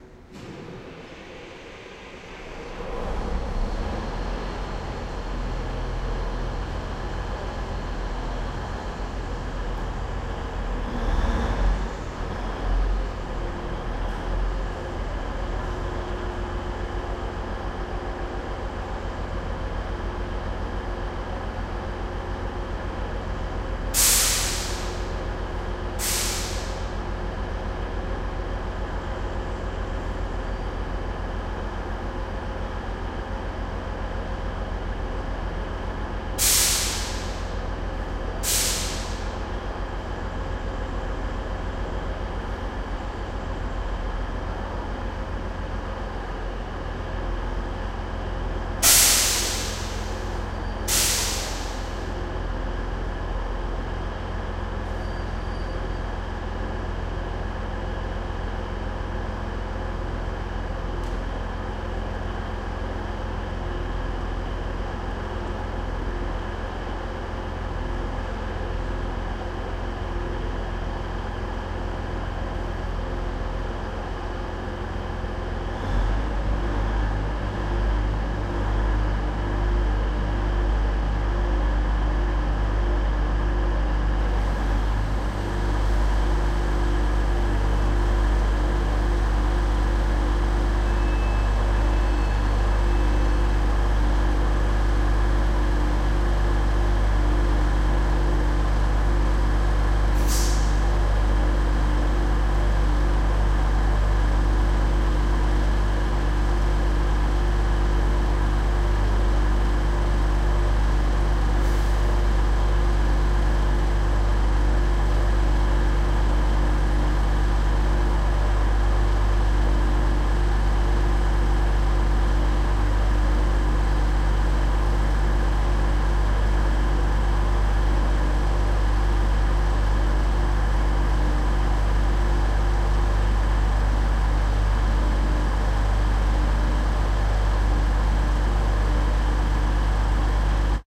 Train Engine Starts
A train starting it's engine at Scarborough station. I didn't see the point in including the middle bit, as it sat there for about ten minutes making the same sound.- Recorded with my Zoom H2 -
train, motor